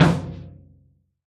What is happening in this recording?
This Tom was recorded by myself with my mobilephone in New York.